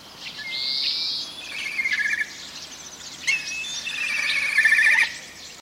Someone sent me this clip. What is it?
20070325.kite.mono
screeching of a Kite, other birds in background. Sennheiser ME66 into Shure FP24, recorded in Edirol R09